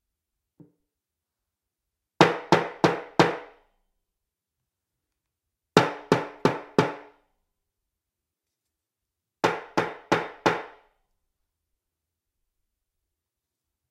Sound of judge hammer in court
court, hammer, knock, wood